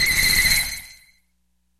reverser beep
An unpleasant noise going through a Yamaha SPX50D set to Early Reflections Reverse.
digital, noise, reverb, reverse, scream